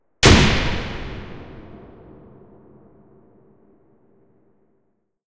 Lightening bang Impact
Huge impact sound, great for lightening or explosions